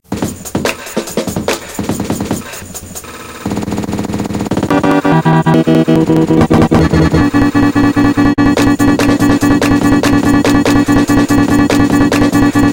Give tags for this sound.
data pure

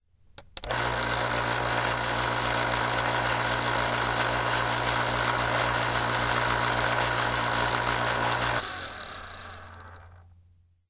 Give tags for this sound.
Can-Opener Electric

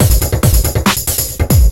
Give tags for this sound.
loops free

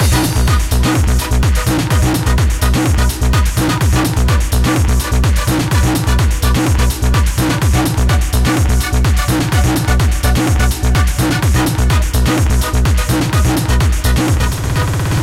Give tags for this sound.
huge loop massive rave

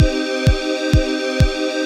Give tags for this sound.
beat dance 128 bpm loop chord

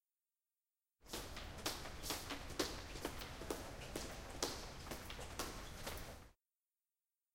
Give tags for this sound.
Sneakers UPF-CS14 Jeans Steps Tallers campus-upf Walking Hall Feet

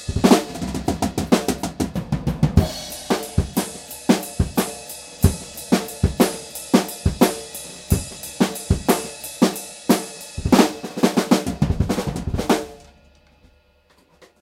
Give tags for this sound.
groove drumroll funky groovy drums drumbreak loop roll

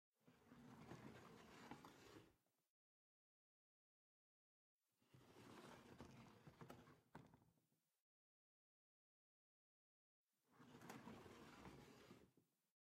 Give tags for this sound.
chair chair-slide slide wood wood-chair

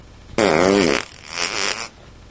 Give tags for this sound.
noise poot explosion

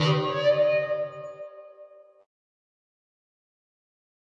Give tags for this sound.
mic processed contact rubberband fx remix

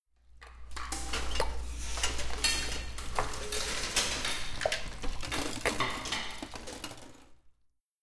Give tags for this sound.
kitchen dishes ambient environment cooking ambience knifes chef

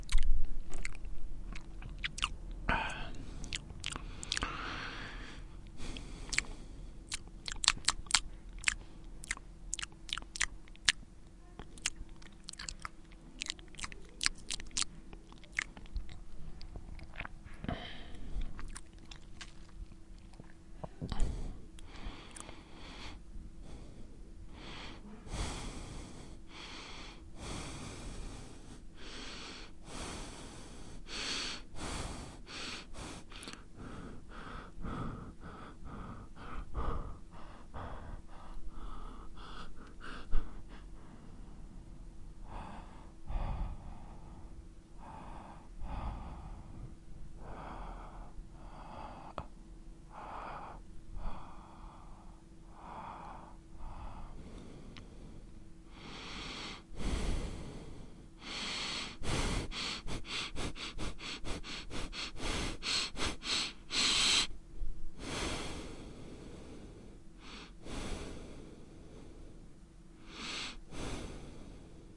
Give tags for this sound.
people slurp inhale men human male breathing gasp breath

